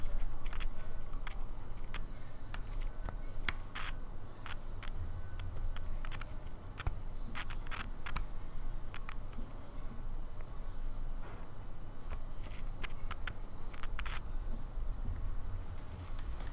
Lovely Cube Problem (Right channel only)
Lovely Cube noise Problem (Right channel only)!
Cube, Problem, Right, channel, noise, Lovely